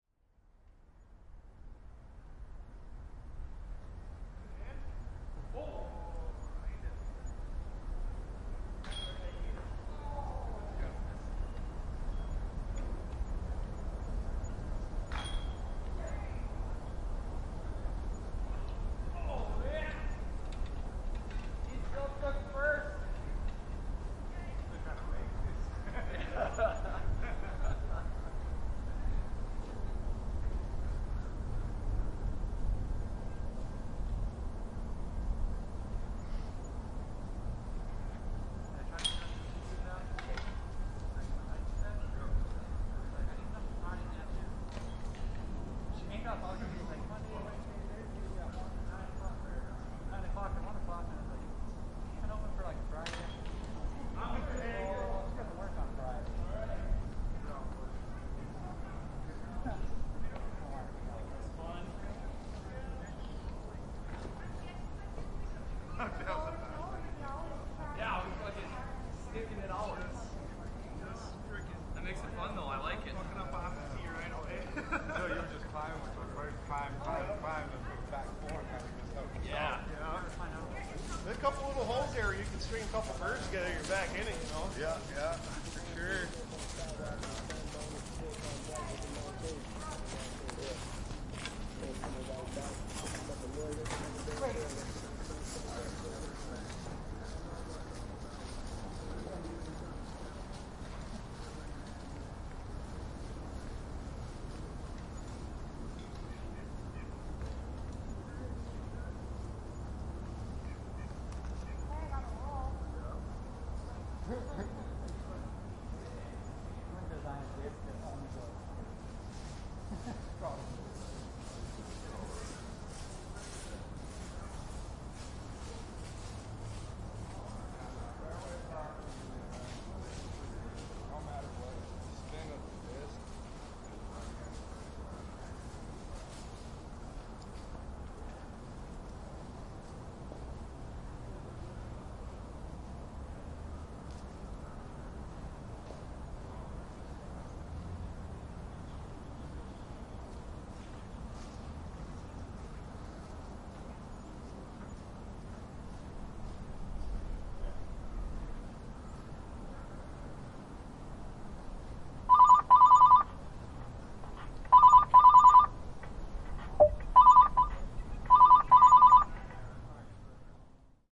Maybe he's a hitman waiting for the go-ahead, or a drug dealer waiting for the call, but somebody is parked in the local park, watching the disc golfers, when the call finally comes in.